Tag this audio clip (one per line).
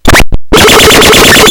circuit-bent
coleco
core
experimental
glitch
just-plain-mental
murderbreak
rythmic-distortion